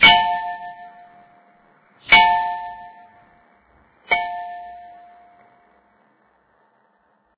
Hit a Glass Vase with my Finger(s), mostly Ankle has some nice Accoustic.
-Last 3 Hits of the whole Sample